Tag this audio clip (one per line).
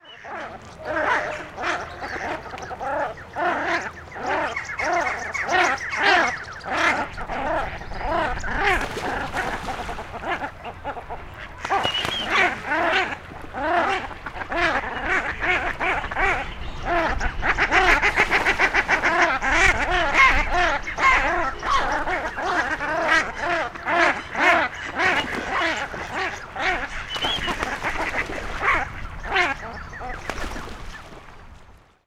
Splash
Shell-duck
Quack
Ducks
Squabble